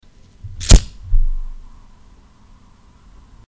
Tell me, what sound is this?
Using a lighter.
cigarette, feuerzeug, flue, lighter